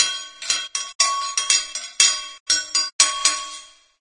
Machine loop 17
Various loops from a range of office, factory and industrial machinery. Useful background SFX loops